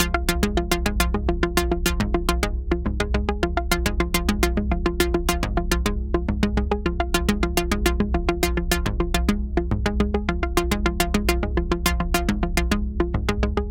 Loop created using Lokomotive VST from Arketype Instruments.